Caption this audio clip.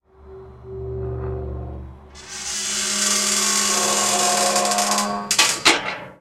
closing-gate
A mysterious, metallic gate sound.
Created from these sounds:
metallic, open, gate